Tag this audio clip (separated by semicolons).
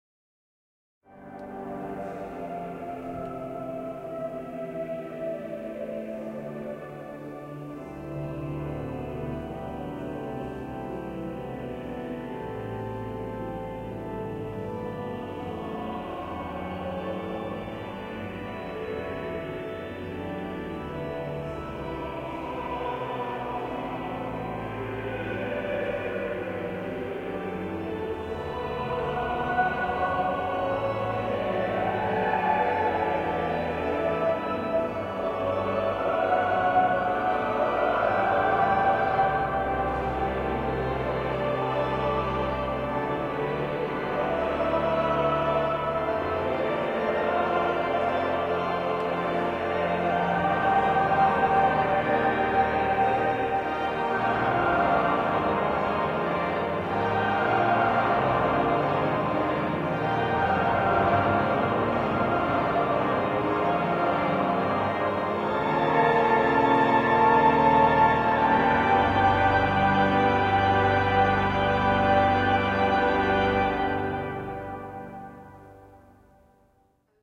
canterbury; cathedral; choir; church; congregation; hymn; large; organ; space